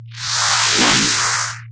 image sounds (1)
image converted to audio
sound, image, image-to-sound